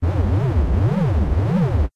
Laser Charge
laser charging up